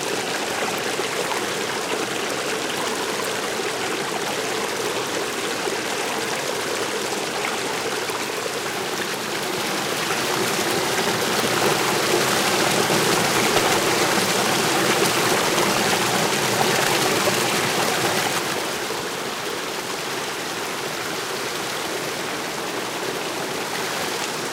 Small stream.raw
Recorded at Eagle Creek trail on Tascam HDP2 using a Sterling Audio ST31 microphone.
field-recording, Nature, stream, waterfall